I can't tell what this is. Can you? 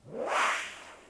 saltar mover volar